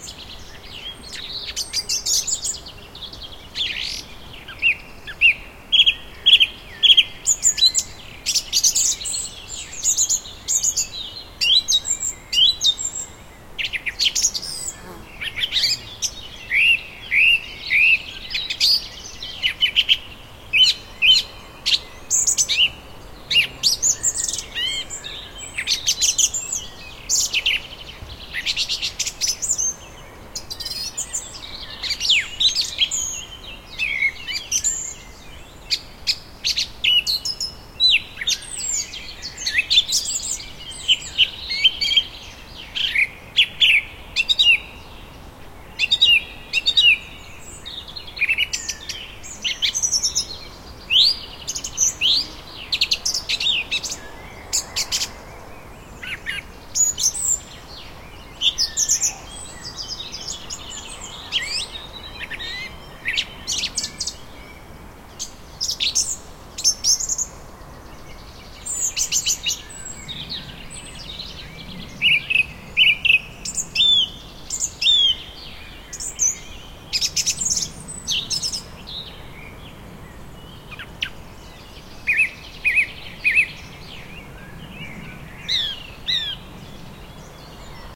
140809 FrybgWb CompostHeap Evening F
A summer evening in a vineyard by the German town of Freyburg on Unstrut.
The recording abounds with natural background noises (wind in trees, birds, insects).
The recorder is located next to a compost heap at the bottom of the vineyard, facing across the valley below.
These are the FRONT channels of a 4ch surround recording.
Recording conducted with a Zoom H2, mic's set to 90° dispersion.